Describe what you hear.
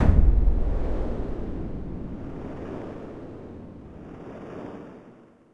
A sound of when something stomps the ground hard.